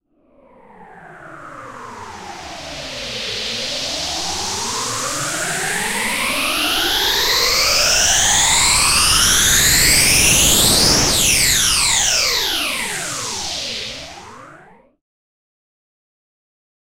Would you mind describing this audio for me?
SL Uplifter 01
White noise uplifter